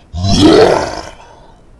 Short Monster Roar
A roar.
Made with Audacity, editing my own voice.
ghost, growl, scary